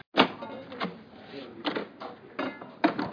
Door open and slam